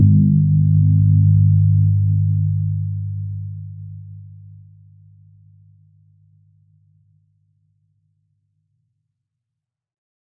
22 in. Asian Gong
A antique Asian Gong 22" approx.100 hertz (G2-Ab2)